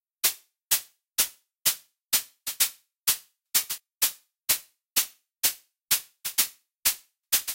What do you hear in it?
Hats
Made in Reason, EDS06s
hat, hats, techno